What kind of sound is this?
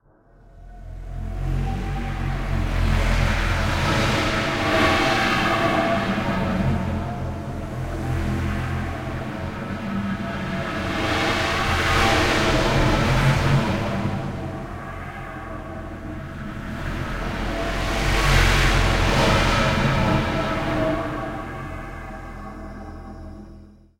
wounded beast y35o2
Simulation of the howling of a wounded beast.
animal, monster, synthetic, sharp, wounded, hiss, drone, howl, beast, bellow, roar